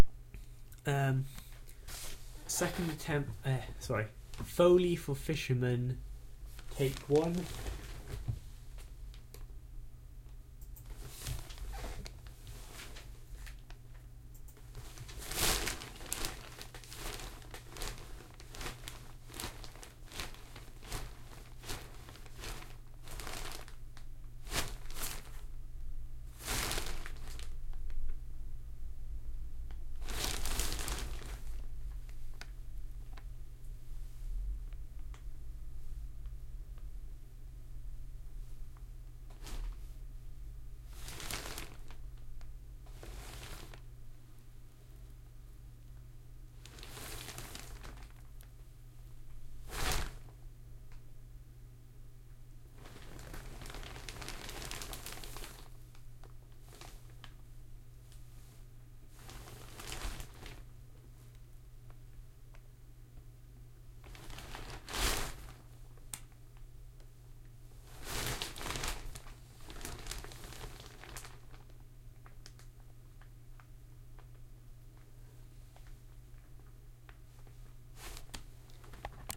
a plastic sheet being bent repeatedly